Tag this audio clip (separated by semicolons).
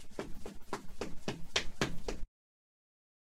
pie; paso; pasos